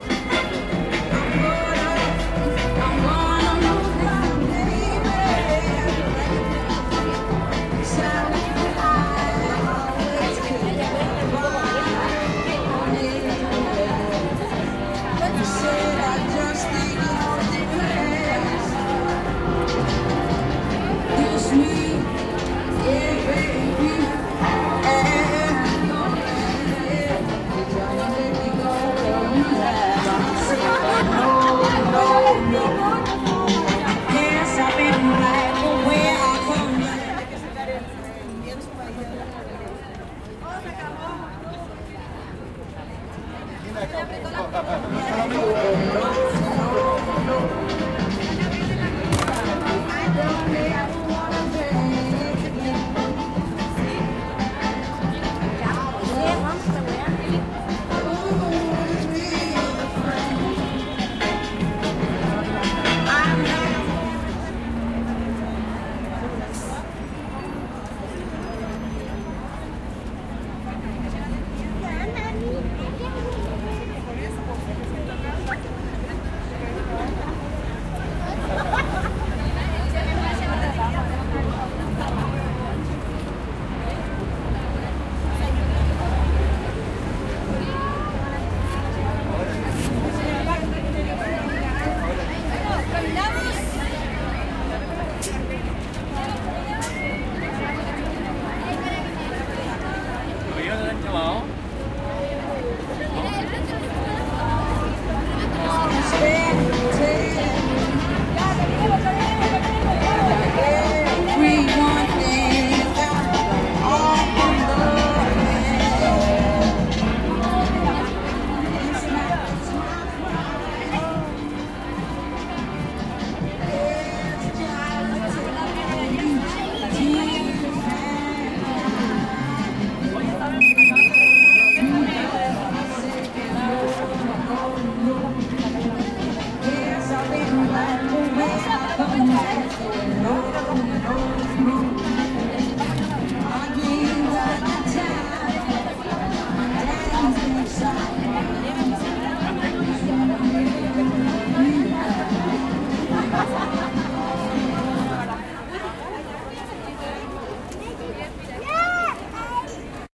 marcha de las putas y maracas 01 - amy winehouse
Amy winehouse se escucha en la espera, fuera de la estación del metro Santa Lucía, y llega gente en un fondo de tránsito.